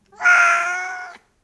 A big cat "meow". Recorded with a Tascam DR100 recorder; normalized to -3dB.
animals, meow, cat